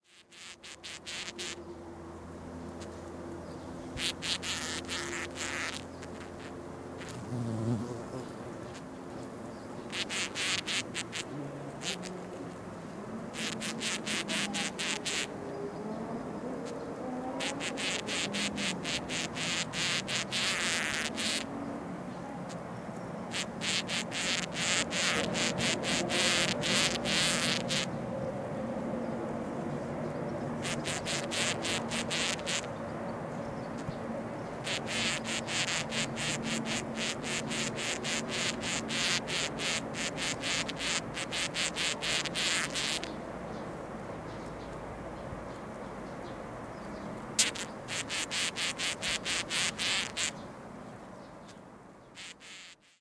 A ant new queen (gyne) digging for her new nest.